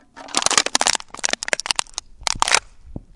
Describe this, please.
Deformation of an empty beer can.